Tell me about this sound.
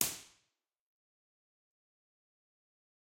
Mejeriet bred lineaudio
Dogbreath-studio, IR, Small-room